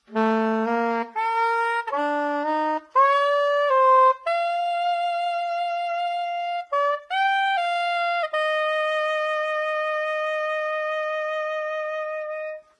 Non-sense sax.
Recorded mono with mic over the left hand.
I used it for a little interactive html internet composition:
sax, soprano-sax, loop, soprano, melody, saxophone